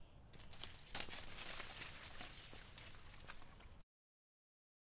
Plants rustling
Cup dragged through a bunch of plants. Rustling leaves, sound deep, similar to fire or wind, bending, twigs brushed. Recorded with a zoom H6 recorder/ microphone on stereo. Recorded in South Africa Centurion Southdowns estate. This was recorded for my college assignment.
brushing, bush, field, field-recording, fire, foliage, forest, leaf, leaves, nature, OWI, plants, plants-rustling, rustle, rustling, storm, tree, trees, twig, wind